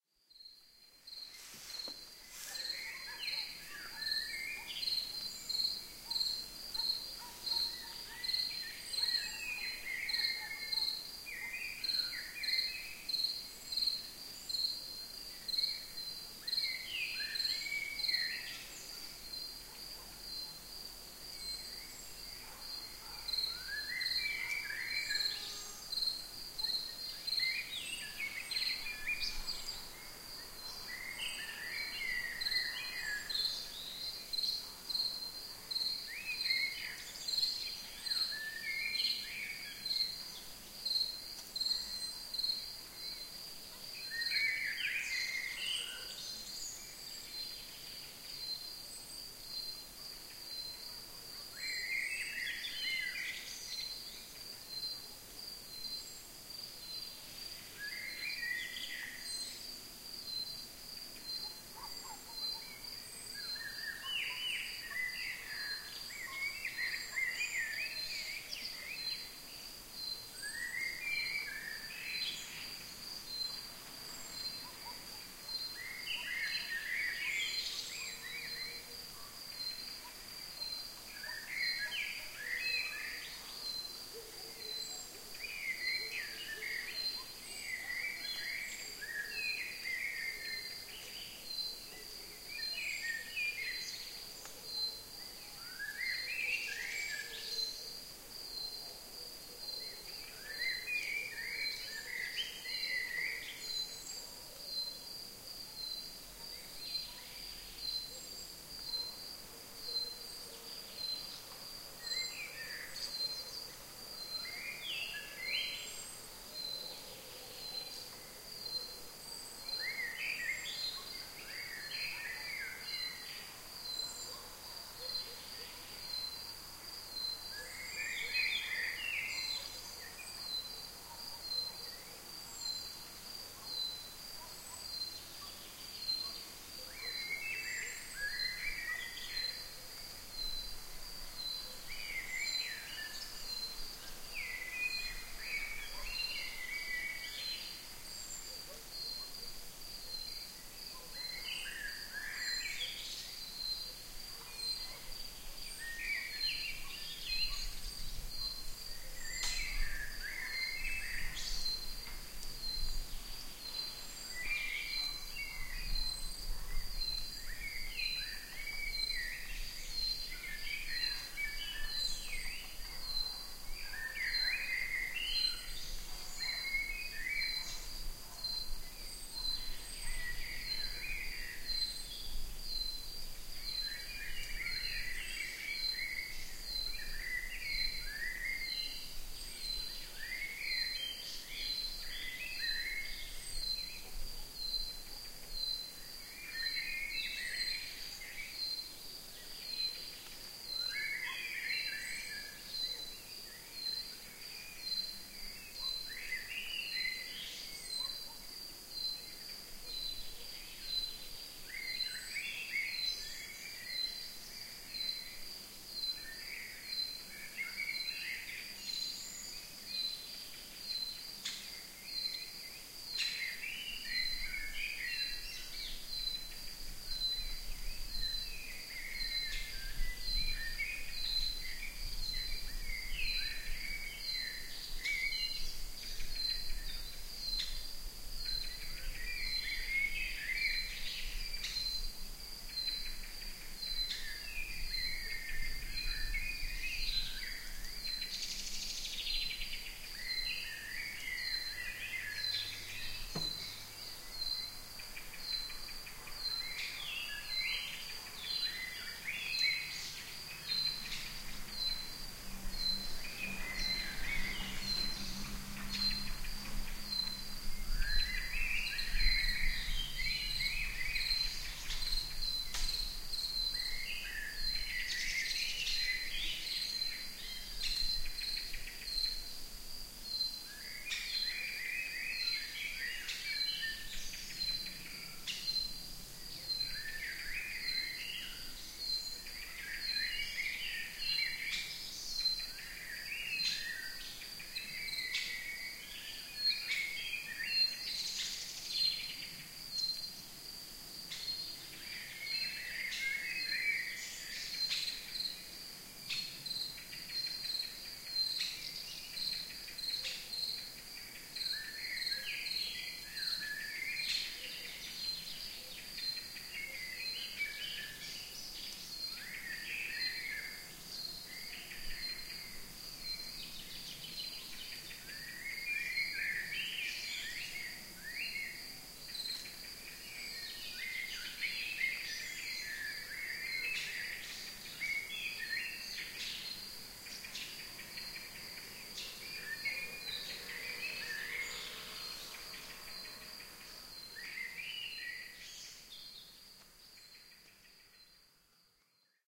Singing Birds 2 - (Kouri Forest - Salonika) 22.05.12 18:27
It's afternoon. Crickets and birds making songs in the forest. Some dogs barking far away. I use Adobe Audition CS 5.5 cutting some low frequencies for better results.
Recording Device: ZOOM Handy Recorder H2
Birds, Environment, Forest, Kouri, Rellax